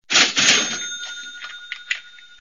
Cha Ching
A short but powerful sound of an old cash machine. Cha Chiiiiiing or Ka Tsjiiiiing.